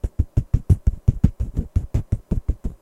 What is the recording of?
Recorded by mouth
run, footsteps, running